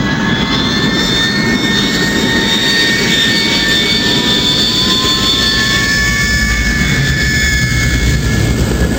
Starting sound of a rocket for a spaceflight
spaceflight, rocket